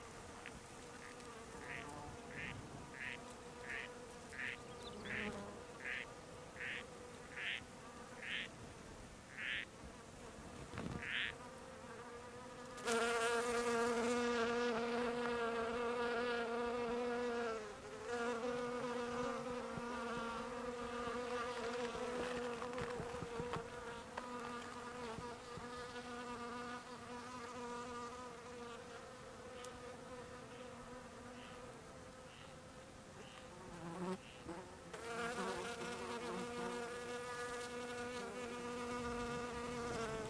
honeybees foraging at Gorse flowers.sennheiser me66+AKG CK94-shure fp24-iRiver H120, decoded to mid-side stereo